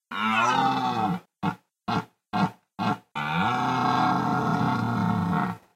Angry Ram
Roars and grunts of a large mammal.
Stretched out the last roar for comedic effect.